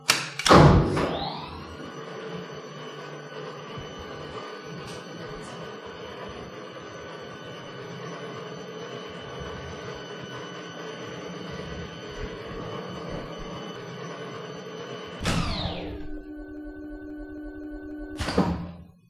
This was re-sampled from the original by pempi. The sound was cleaned from any noise (unfortunately the electrical hum disappeared) and edited in order to make it longer. Added some variations, so it doesn't sound too looped. The stereo channels of the original soundtrack were mixed in a single mono channel.
elevator, motor